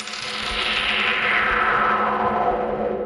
ABIDAR Dina 2022 son2
For my second sound I wanted to create a strange sound such as an alien music.
To create this effect I recorded coins falling and spinning on my classroom table.
I started by adding a time slip then by the Wahwah effect to make this alien sound.
I also added echo to intensify the alien effect and I changed the speed to 0.70, so I slowed it down to give a more creepy effect.
I ended up putting a fade in.
Sci-Fi, Strange, Space, Alien